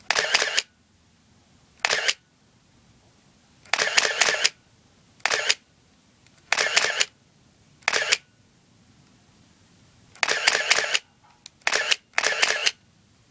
EOS3 ShutterSnaps
Various shutter camera click sounds from a Canon EOS 3 camera. There's no film in the camera but I put some batteries in and it makes some sweet noises.